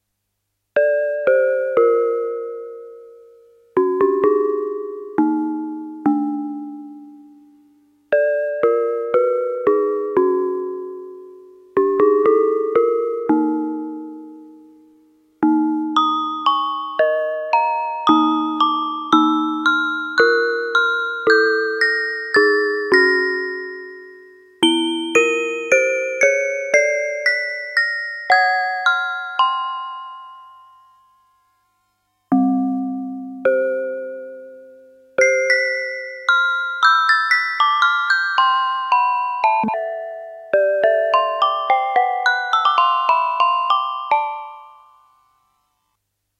Yamaha PSS-370 - Sounds Row 4 - 03
Recordings of a Yamaha PSS-370 keyboard with built-in FM-synthesizer
FM-synthesizer, Keyboard, PSS-370, Yamaha